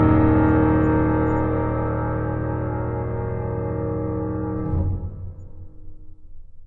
Atmospheric piano chord
A single grand piano chord (dark and moody) with an overly-loud pedal-up sound at the end.
atmosphere, pedal, piano